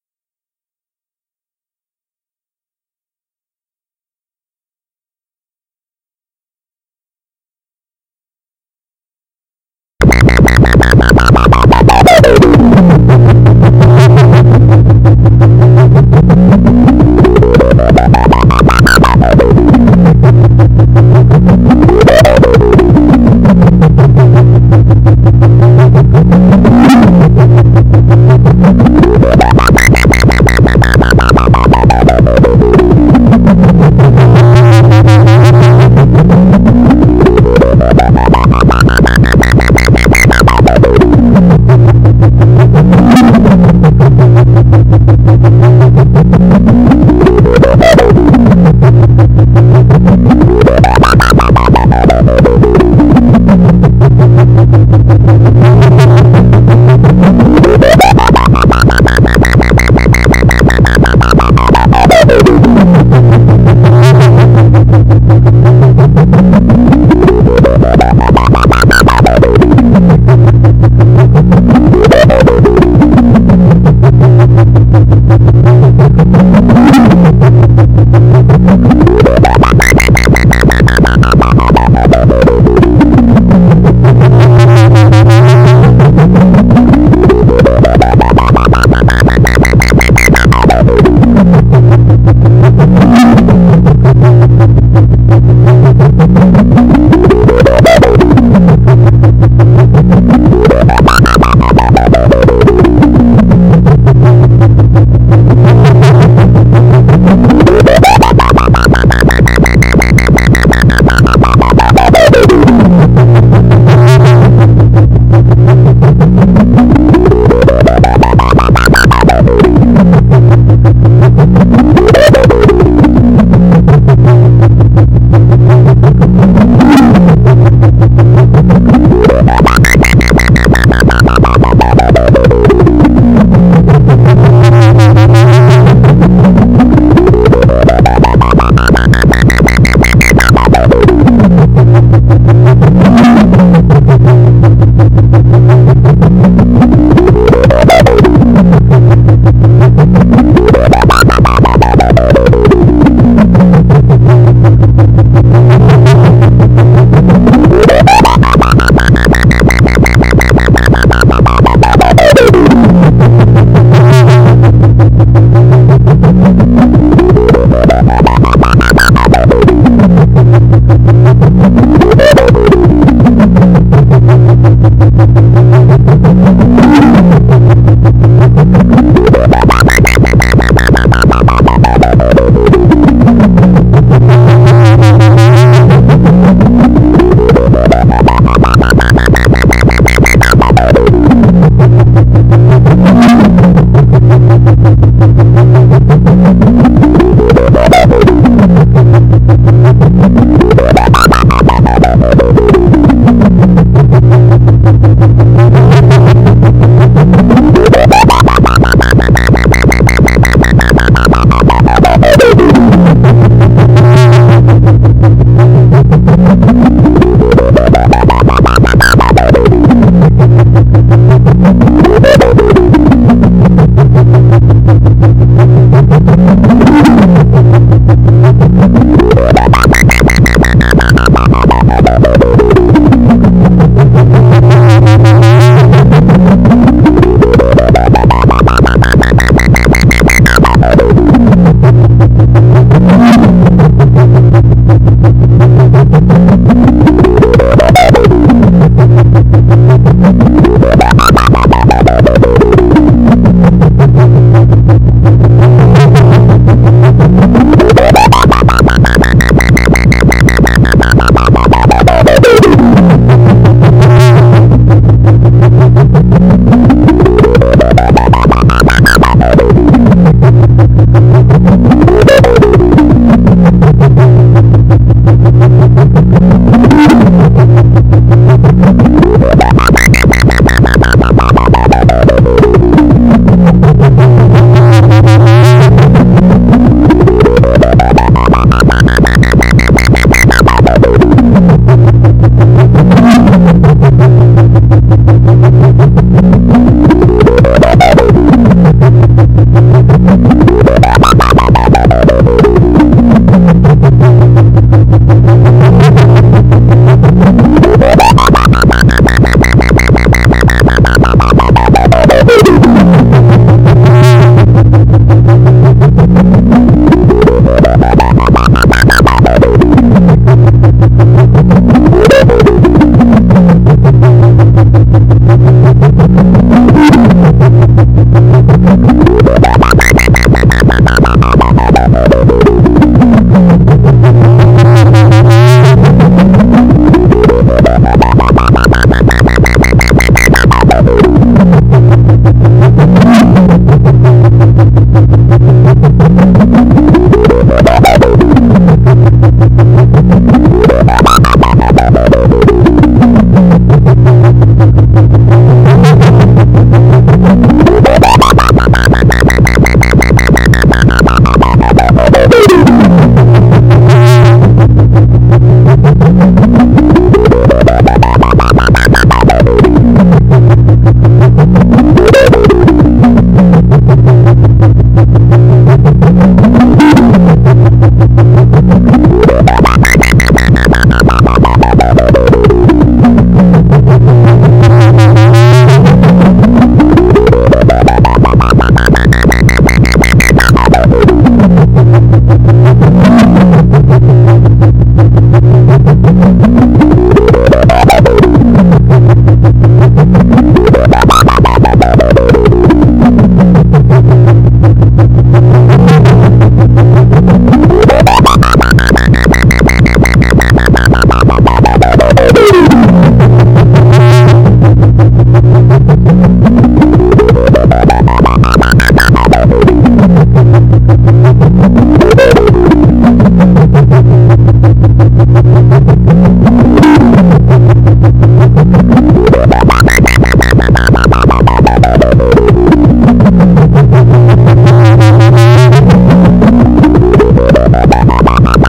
WubWub Machine10 Sec Delay
WubWubWub Added 10 second delay as fuse
motor, strange, freaky, machine, future, fuse, wub, robot-heart, abstract, phaser, electric, spooky, android